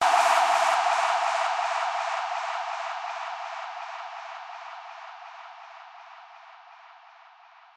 Spacey Trip
Sound, Soundscape, Synth